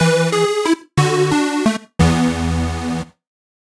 An 8-bit losing jingle sound to be used in old school games. Useful for when running out of time, dying and failing to complete objectives.